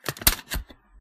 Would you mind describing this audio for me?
Take cd from cd rom
This is the sound of a cd taken from cd rom. Recorded with an iPhone SE and edited with GoldWave.